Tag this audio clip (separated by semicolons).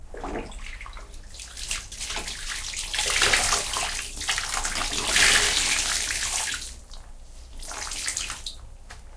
clean; cleaning; dust-cloth; dustcloth; extracted; water